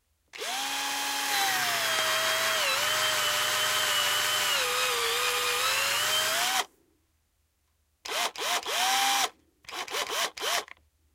Cordless power drill, recorded at full speed then with some tension on it as well as short bursts at the end.